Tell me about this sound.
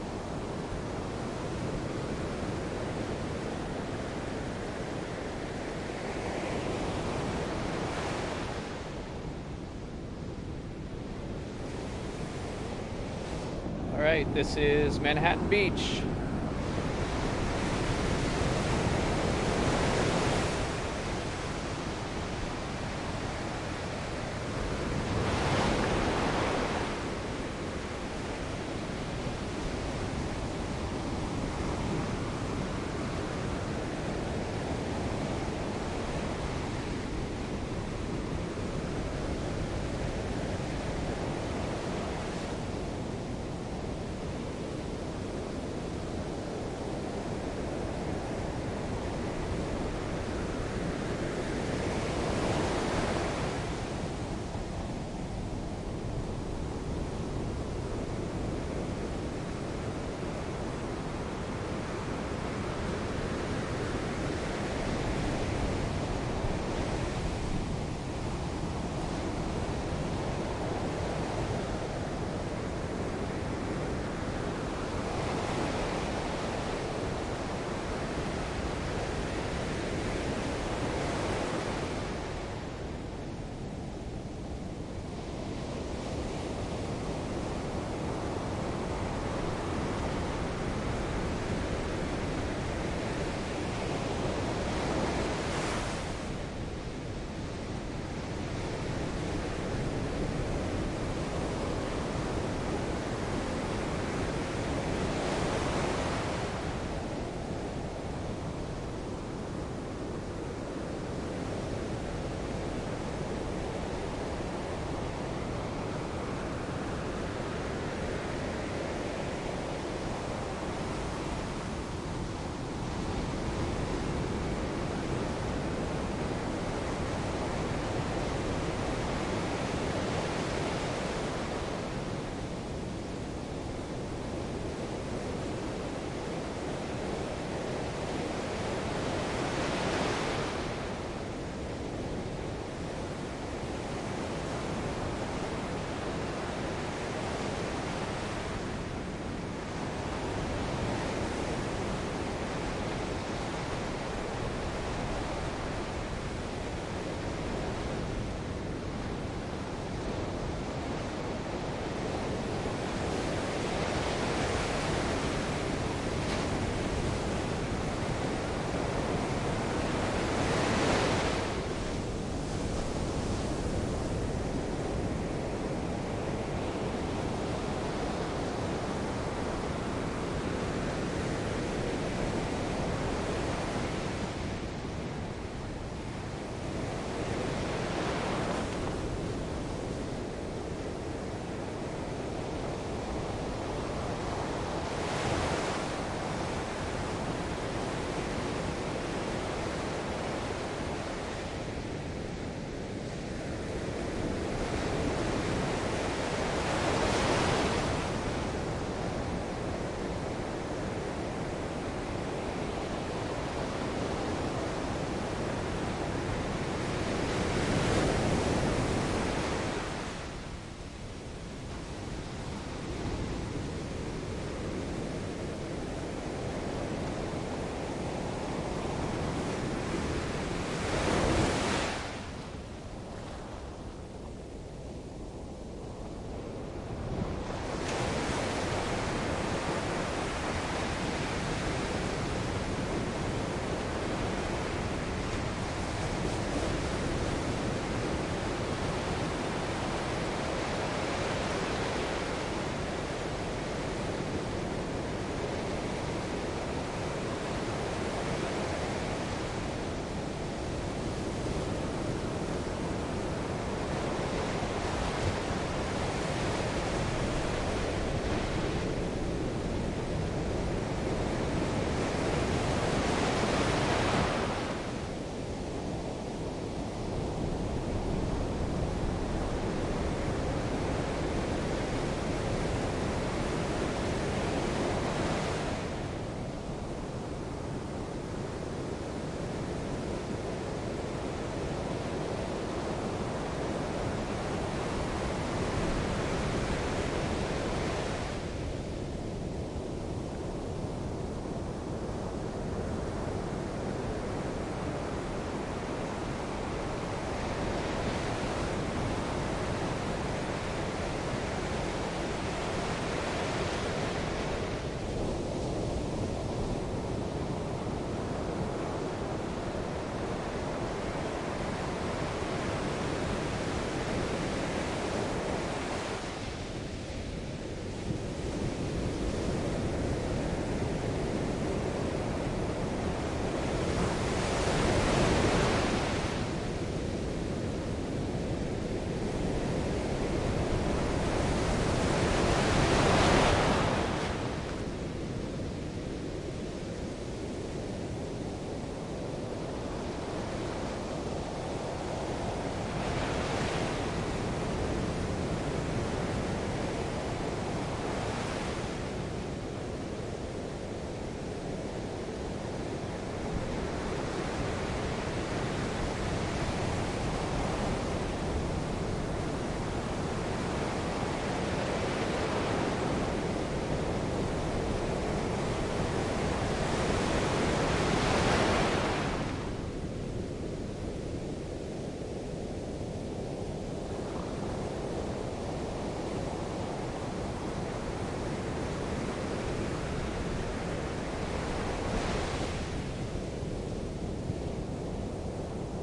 Manhattan Beach CA 01 5.1 Surround
Nighttime in the ocean of Manhattan Beach. Center channel is pointed to the west, microphone is 3ft off the water. Waves break in the LCR, then roll through to the Ls / Rs and the sea foam fizzes. You’ll hear the water breaking around my calves in the Ls and Rs.
Credit Title: Sound Effects Recordist
Microphone: DPA 5100
Recorder: Zaxcom DEVA V
Channel Configuration (Film): L, C, R, Ls, Rs, LFE